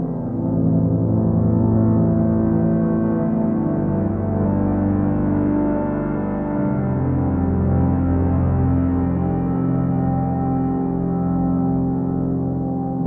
A very lush couple of notes from a Nord Modular patch through a Yamaha SPX-90 reverb. Loops perfectly.